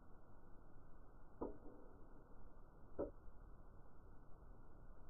A long bomb noise... sounds sort of like a nuke i guess, Even has debris falling afterwards. Made by popping a little trick noisemaker by the mic and slowing it down.